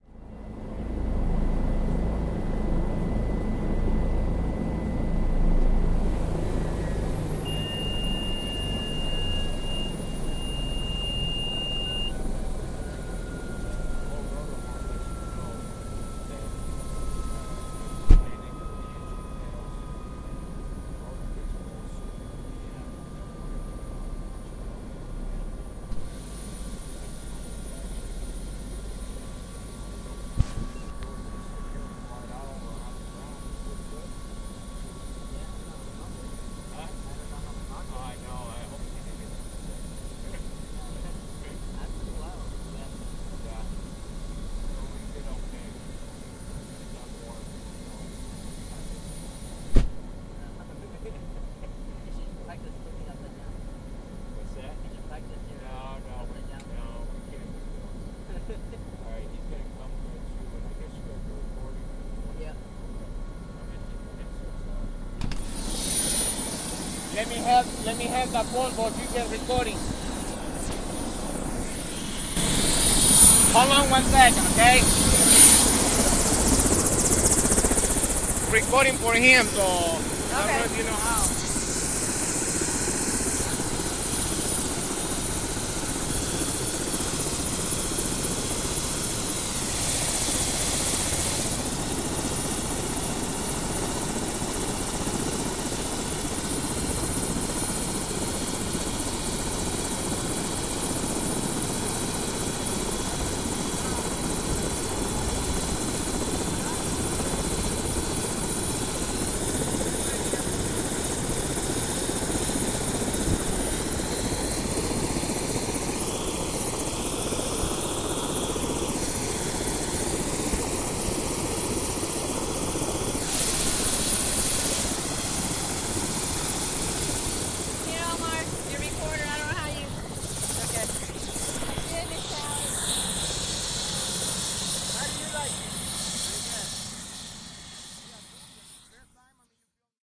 helicopter landing, exit
This recording is real!! Same helicopter, comes in for landing. Interior field recording. Note: Engine does not shut down here.